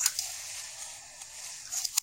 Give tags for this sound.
pneumatic; closing; opening; panel; door; machine; mechanical; scifi

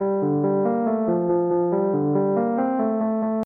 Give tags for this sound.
organ; calm; rhodes; piano; school; rock; jazz; old; acid; sweet

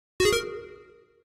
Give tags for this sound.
arcade; button

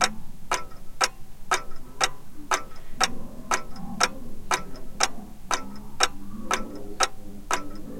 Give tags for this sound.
slowed; ticking; slow; clockwork; tick-tock; clock; tick

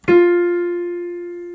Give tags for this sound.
F; Piano

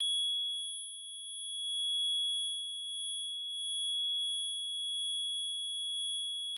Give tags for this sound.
ringing; high-pitched; hum; tone; tinnitus; treble

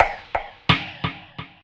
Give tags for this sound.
drum; electronic; percussion; synthetic

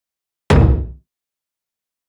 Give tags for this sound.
bash; bass; battle; beat; boom; cinematic; deep; drum; effect; epic; game; hand; hit; impact; karate; kick; low; punch; quarrel; shot; strike; stroke

